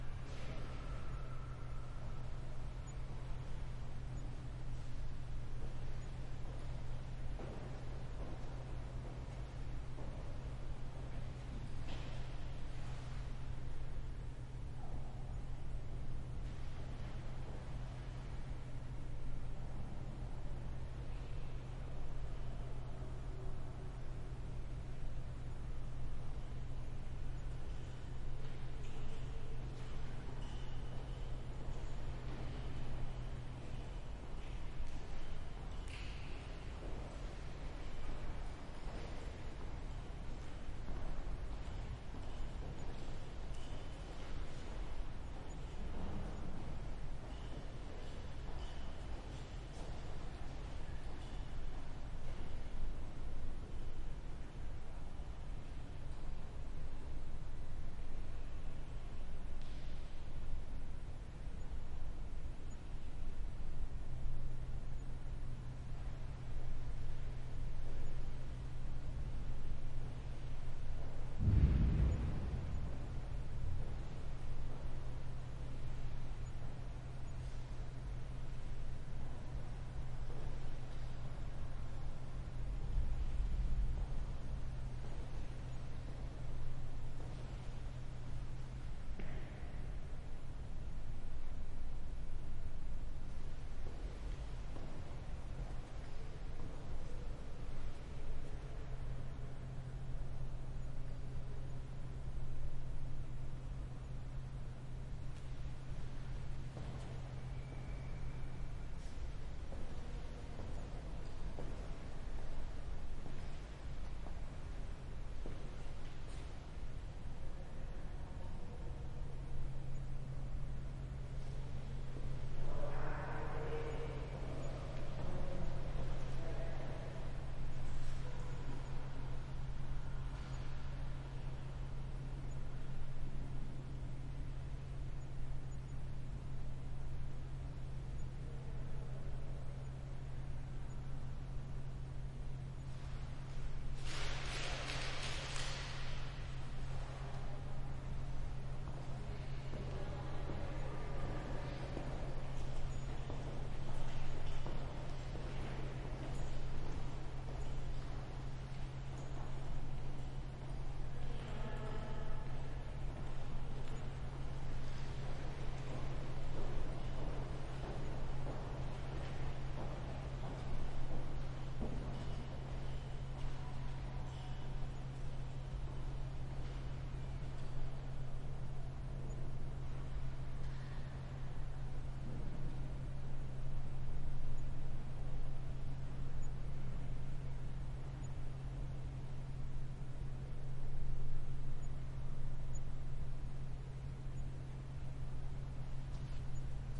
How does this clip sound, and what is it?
Museum Gallery 6
airy, ambience, art, chatter, echo, gallery, museum, people, room, tone